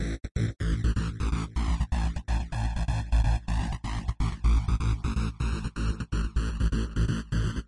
Menu rev2
Made and edited in Reason 7
video-game, menu